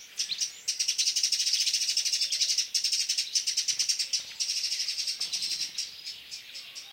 Blackcap makes a characteristic 'angry' call
birds, blackcap, field-recording, nature, south-spain, spring